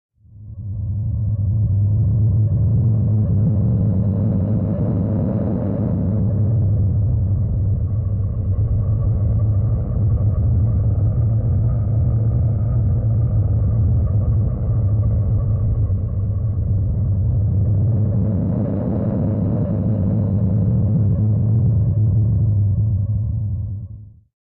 SciFi Horror Atmosphere
Making a science fiction horror film? Here's some ambience! Made using Mixcraft 9.
Ambience, Horror, loop, Scifi